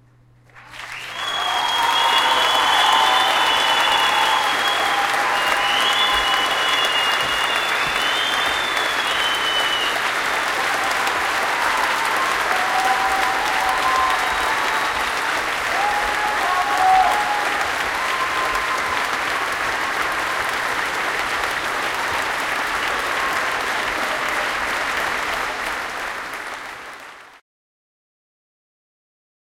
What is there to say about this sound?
Final applause, big and cool!